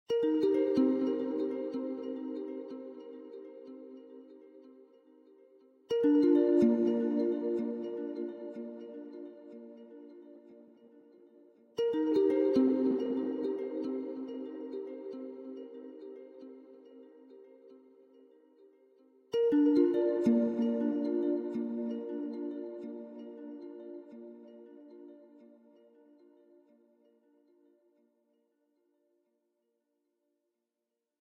93 bpm. Deconstructed Chords, Cm7, Bflat
Slow staccato strings, with a lot of reverb and delay. Great for a chill or ambient song.